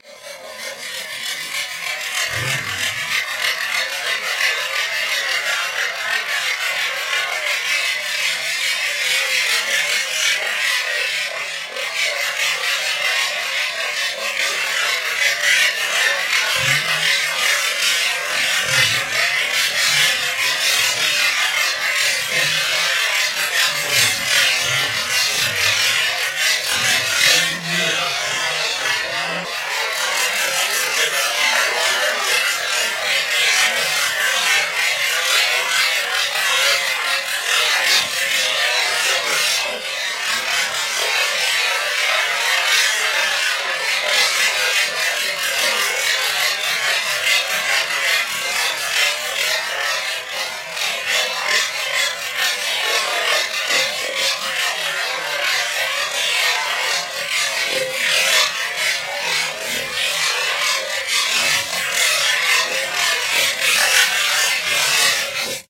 nails scratching sped up
I'm not sure what happened here, but this used to be the sounds of my nails scratching the plastic back of a chair. I isolated a region and then tried to speed it up in classic mode, but maybe it was accidentally free mode? Who knows.
noise, creepy, experimental, logic-pro-9, ghosts, future, artifacts, strange, weird, corrupt-file, sinister, spooky, abstract, scary, freaky, haunted, terror, aliens